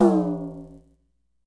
Nord Drum TOM 7
Nord Drum mono 16 bits TOM_7
Drum, Nord, TOM7